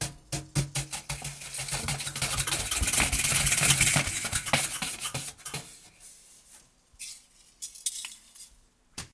Brush hits on metal object
brush, taps, variable, random, scrapes, hits, objects, thumps